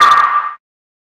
tweaked sp 12 22
Exotic Electronic Percussion56